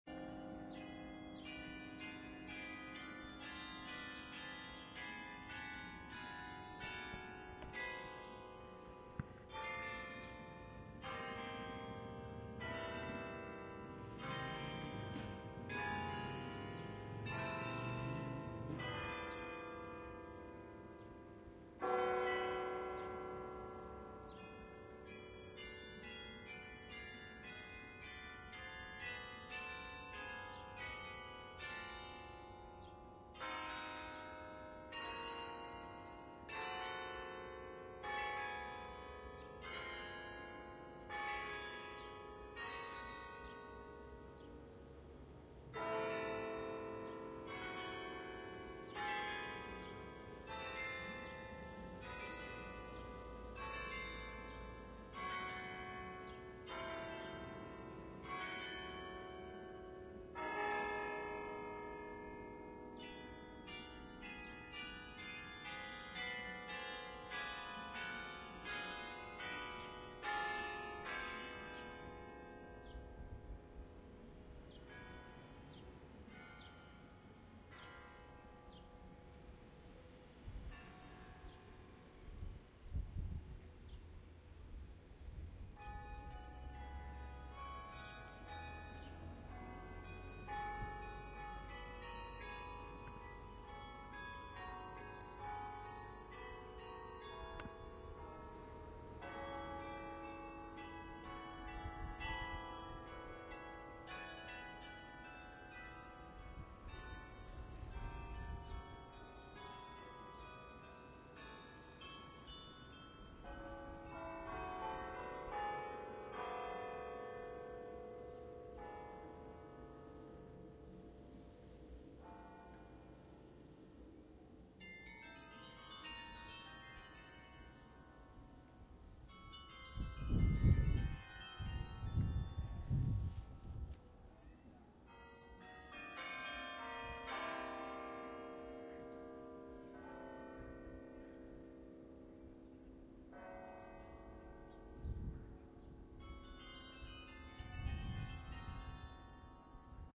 Carillon concert at Berlin, Tiergarten, am 20.04.2014, aufgenommen mit Zoom Q2Hd
ambience, bells